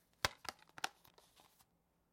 Closing a DVD Case
Case, Closing, DVD